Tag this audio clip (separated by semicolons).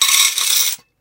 jingle; coin; money